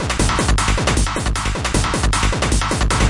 techno beat
rave, electro, hardcore, ultra-techno, hard, trance, dance, club, loop, beat, techno